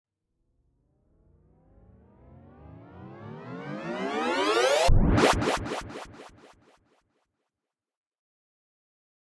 Fador - in out
In-Out, Halled, Fade, Delay, Loop, Synth